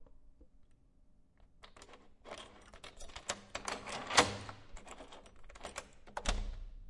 Key Turning in Lock
Sound of a key unlocking a door! Recorded with Zoom H6.